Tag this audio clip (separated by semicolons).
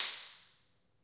clap; snap; hit; echo; reverb; stereo